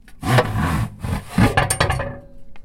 Metallic wire 01
crash, impact, knife, metal, pull, saw, scrape, steel, wire